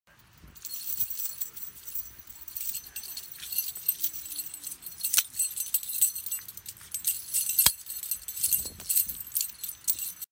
Chains that are moving
Chain, Chains, Metal, moving